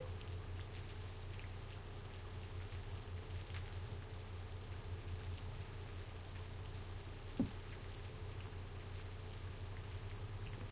Short Rain
short clip of rain
Rain, raining